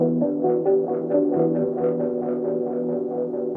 A spacey, echoed loop from a Nord Modular. Reminds me of X-Files theme I think...
ambient, echo, nord, roland, space